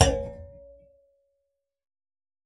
BS Hit 5
metallic effects using a bench vise fixed sawblade and some tools to hit, bend, manipulate.
Bounce, Clunk, Dash, Effect, Hit, Hits, Metal, Sawblade, Sound, Thud